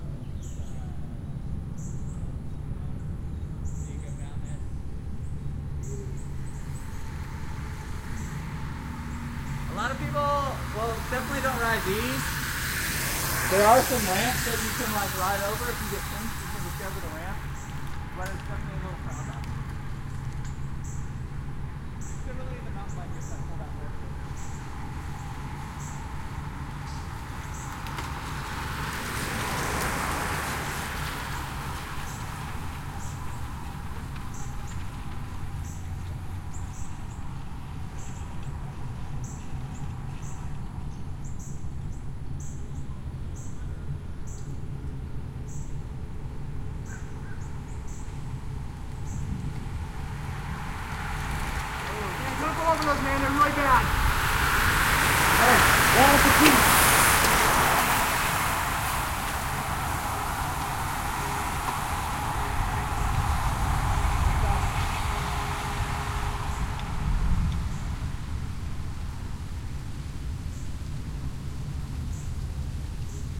passbys w talking
birds in background. Small pass-by with talking then group pass-by with talking. (Don't go over the washboard shoulder is the topic)
Part of a series of recordings made at 'The Driveway' in Austin Texas, an auto racing track. Every Thursday evening the track is taken over by road bikers for the 'Thursday Night Crit'.
field-recording, human, birds, bicycle